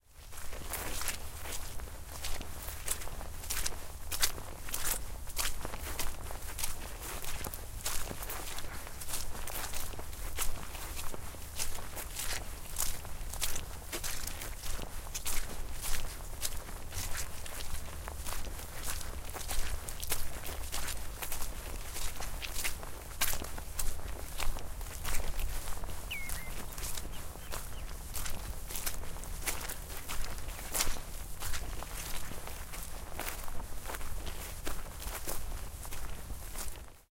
Walking on wet and muddy marsh land with clothing rustle
Walking on wet and muddy marshland at Hamford Water Nature Reserve, Essex, Uk. Recorded with a Zoom H6 MSH-6 stereo mic.
squelch, clothing-rustle, muddy, step, walking, mud